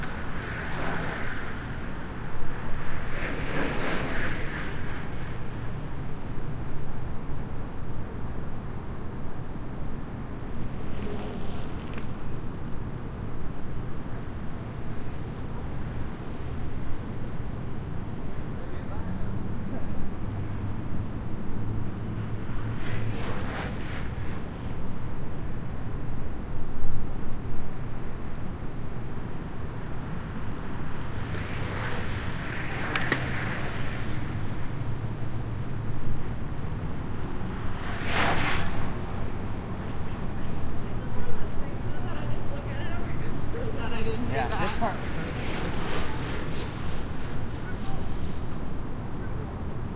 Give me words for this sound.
bike race 03
Before the start of the mens race, the racers pass by as they warm up. Some traffic in the background as well as some talking by the cyclists.
Recorded with a pair of AT4021 mics into a modified Marantz PMD661.
bicycle, bike, cycle, fast, gears, race, stereo, whoosh